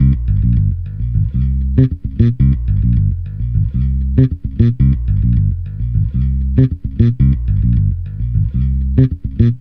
FunkBass GrooveLo0p G#m 11
Funk Bass Groove | Fender Jazz Bass
Bass-Groove, Drums, Ableton-Bass, Bass, Jazz-Bass, Soul, Bass-Sample, Funk, Bass-Samples, Beat, Fender-PBass, Fender-Jazz-Bass, Compressor, Hip-Hop, New-Bass, Fretless, Synth-Loop, Ableton-Loop, Synth-Bass, Bass-Loop, Funk-Bass, Funky-Bass-Loop, Loop-Bass, Groove, Bass-Recording, Logic-Loop